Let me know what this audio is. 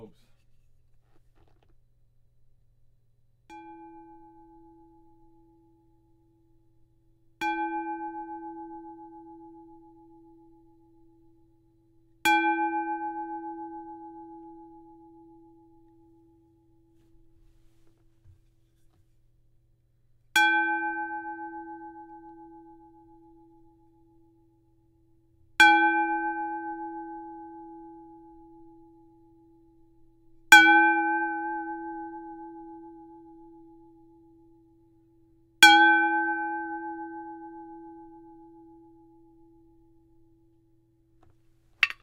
Big Salad Bowl tuned with some water, struck with a large piece of wood. Recorded in reasonable condition, nice long decay. This one is pitched to approximately E